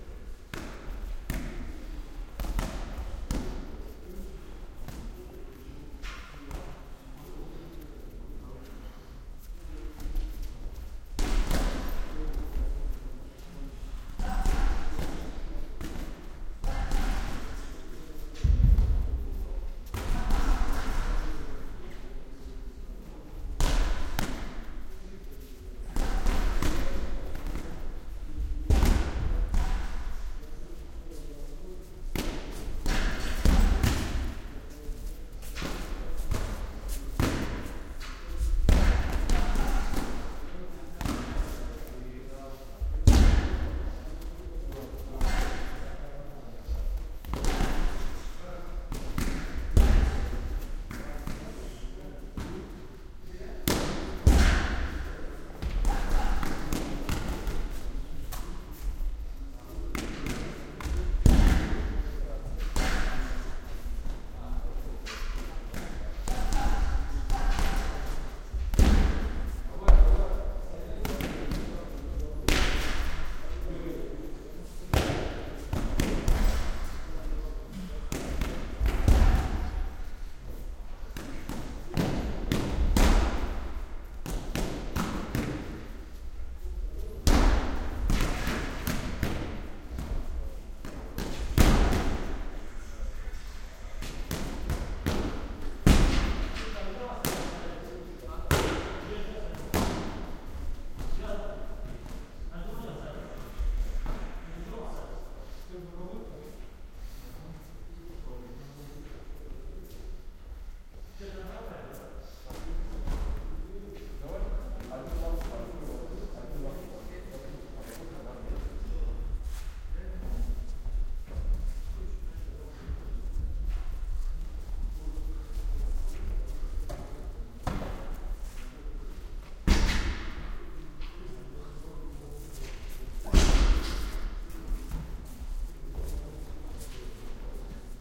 Fight club trainings OMNI 27.02.16
OMNI recording made with Roland R-26 built-in mics in fight-club gym during training.